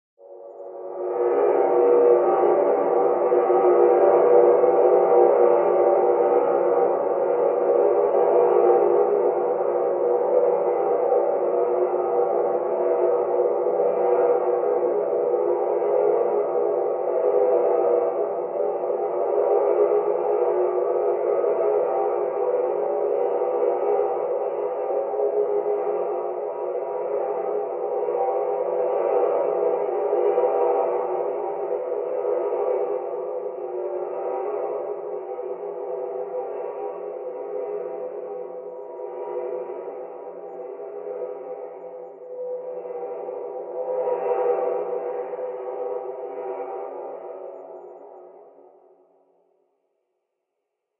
rev spaceship drone full wet resample
A louder, more in your face drone - recording of a saucepan lid span on a ceramic tiled floor, reversed and timestretched then convolved with reverb.
All four samples designed to be layered together/looped/eq'd as needed.
background, drone, fx, grating, metallic, processed, reverb, space, spaceship, timestretched